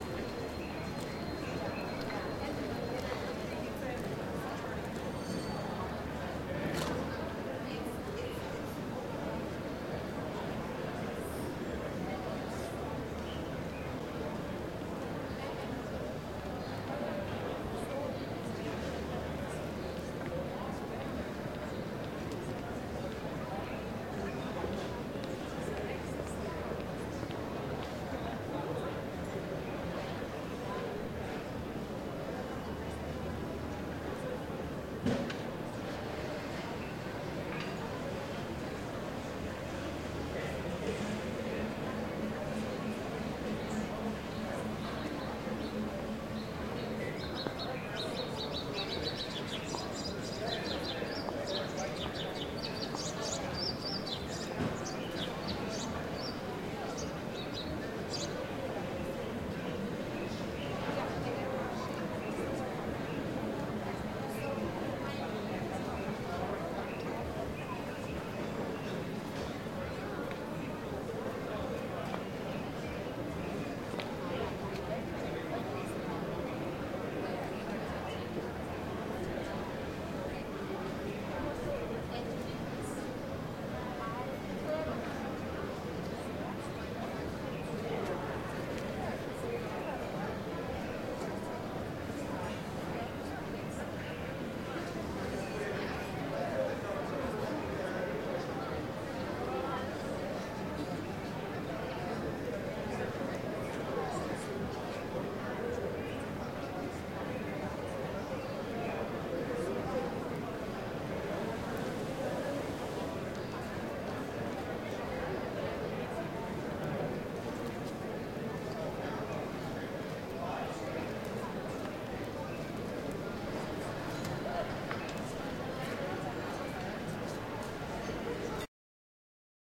OWI Mall Sound Open Area
The ambient sound of a mall that has an open sky area